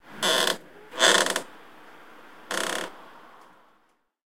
creak window short
The window at my work creaks real bad. Try downpitching this sound a lot, that's more fun. Recorded with Zoom H4n
creak, close, shorter, window